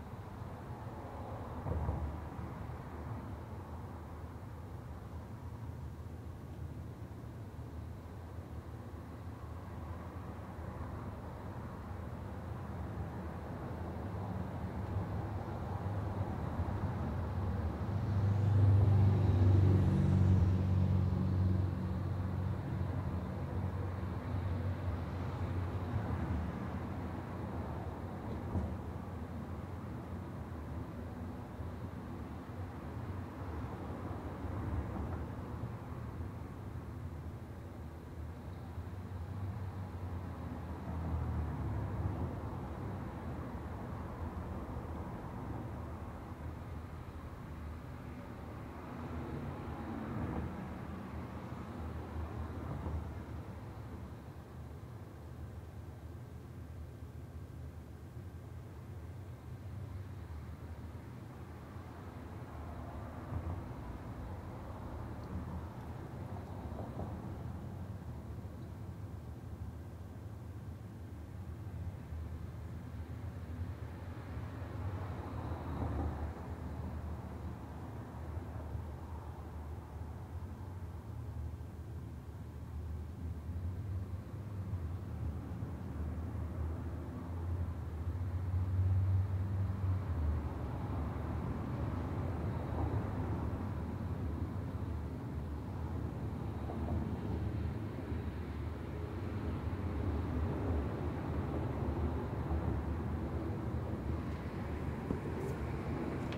AMB Int RoomTone Traffic 001
This is traffic outside my office on Ventura Boulevard, recorded thru the closed window in my office. The traffic is muted because it's recorded through glass.
Recorded with: Sanken CS-1e, Fostex FR2Le
cars, traffic, window, car-by, tone, room, ambience, city, wash, street